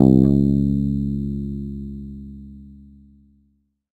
First octave note.
bass, electric, guitar, multisample